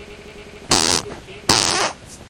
dual fart
aliens, beat, car, explosion, fart, flatulation, flatulence, gas, laser, nascar, noise, poot, space, weird